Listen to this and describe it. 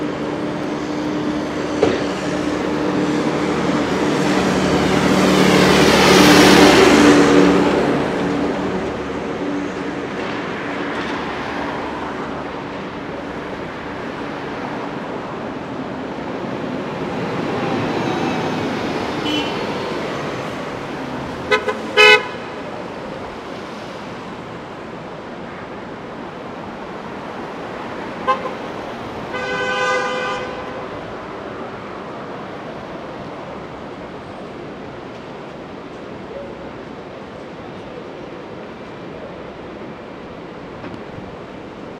horns blare
a few different horns honking. trucks passing on 8th avenue in manhattan
cab, people